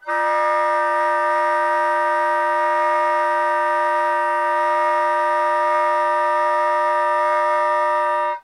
I found the fingering on the book:
Preliminary
exercises & etudes in contemporary techniques for saxophone :
introductory material for study of multiphonics, quarter tones, &
timbre variation / by Ronald L. Caravan. - : Dorn productions, c1980.
Setup:
multiphonics, sax, saxophone, soprano-sax